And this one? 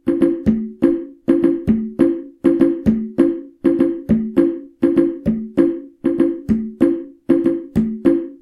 Ethnic Drum Loop - 4
Playing bongo like drums